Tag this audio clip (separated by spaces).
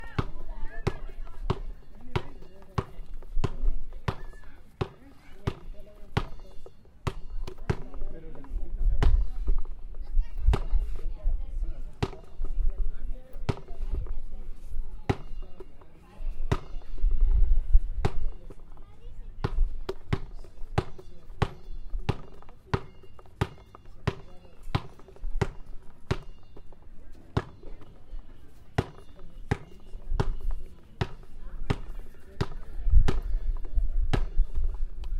ball basket sport